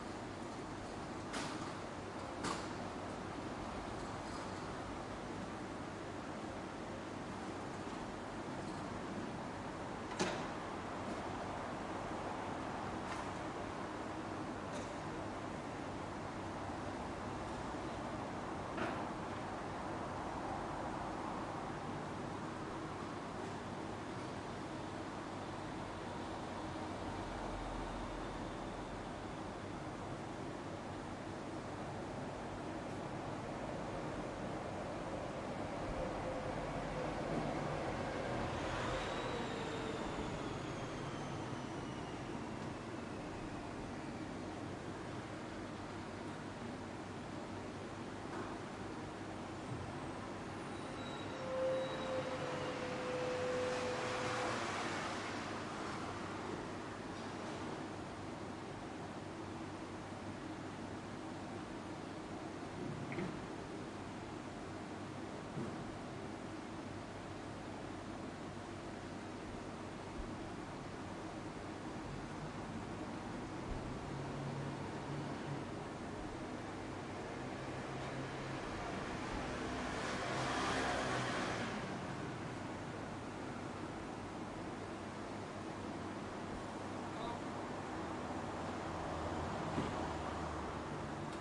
ambiance rue stereo
Calm street in Paris in the afternoon, recorded in 2006.
ambience, urban, city, paris, town, street